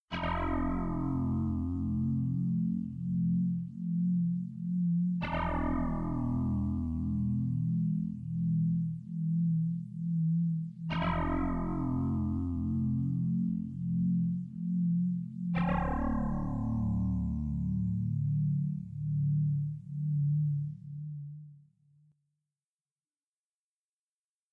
Ominous tone that can be extended. Used for back ground music for a story, created with a digital synth.
ominous, fear, spooky, spectre, haunted, phantom, macabre, suspense, terrifying, thrill, terror, sinister, frightful, horror, music, creepy